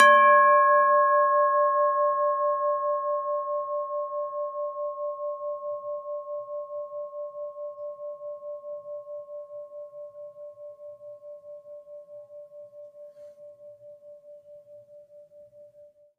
This is a chalice that I ran into in the River Side Hilton in New Orleans. I recorded it into my iPhone and cleaned it up in Pro Tools with iZotope 5.